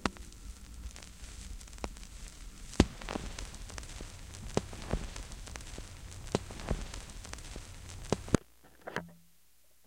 record end 2 (static)
End static of a record.
analog, crackle, record, static